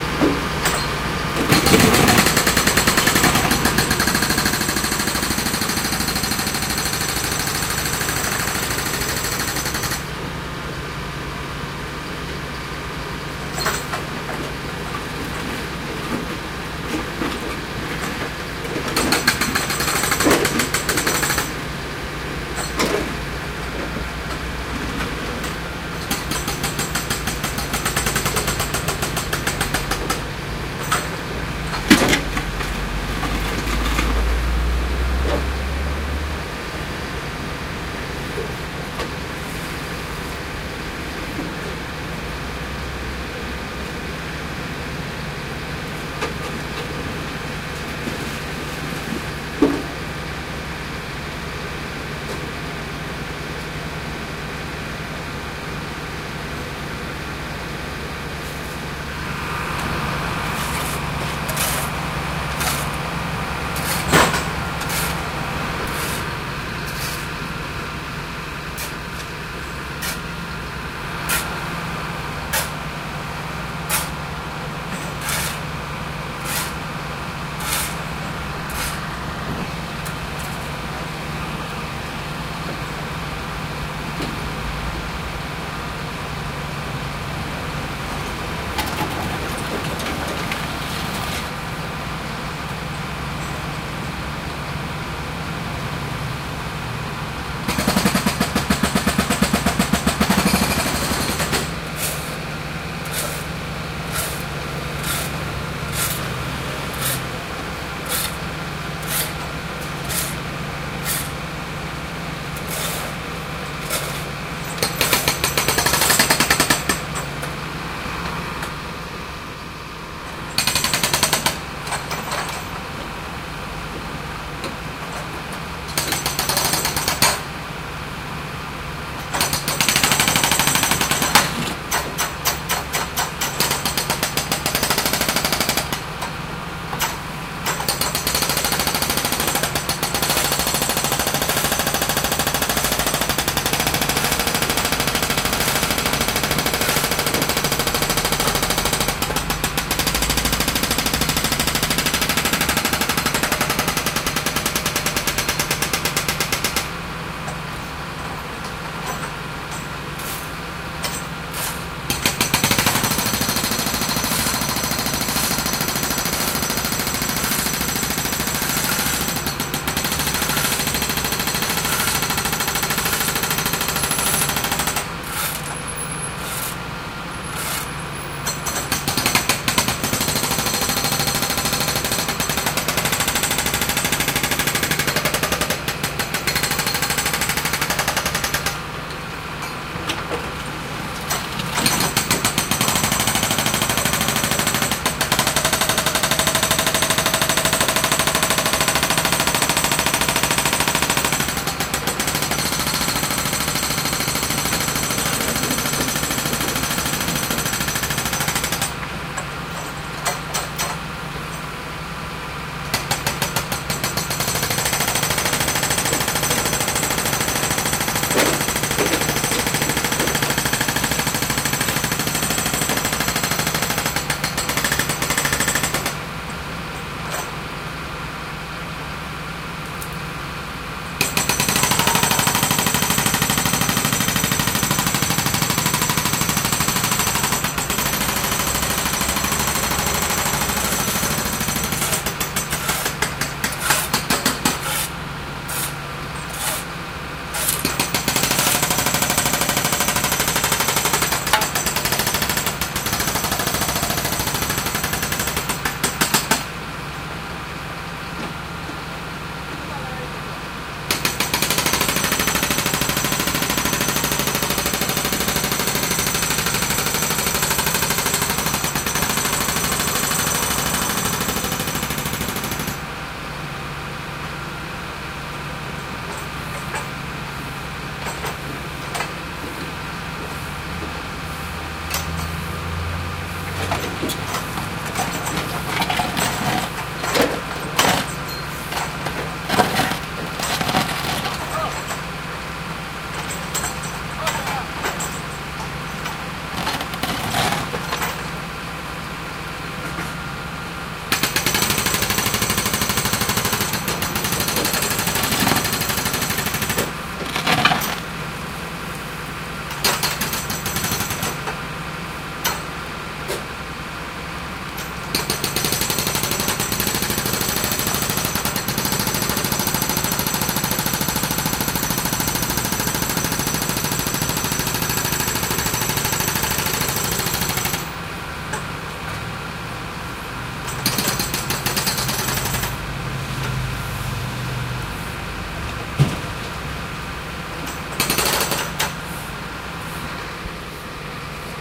Roadwork in a Paris suburb, jackhamme, excavation. Recorded with a zoom h2n.